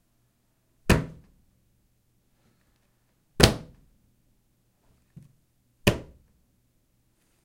Hand body impact on tile, porcelain, bathroom sink
Hand impact on porcelain sink
body, hit, porcelain, hand, bathroom, impact, sink, tile